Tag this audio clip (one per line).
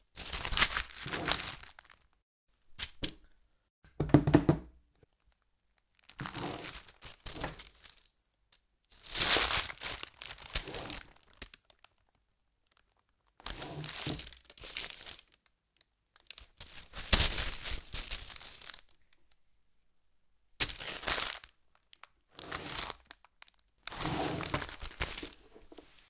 slide
quality
foley
versatile